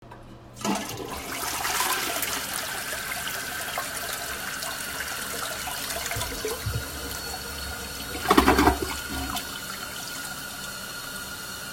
Toilet flushing
flushing a toilet